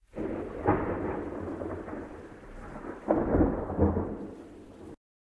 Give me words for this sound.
Thunder From Window
Recorded with a Zoom H4n onboard microphones, spur of the moment kind of thing. No checking for an optimal recording position, or levels. I just switched it on, opened the window fully and started recording. The batteries were running on empty so I quickly caught as much as I could.
Window, From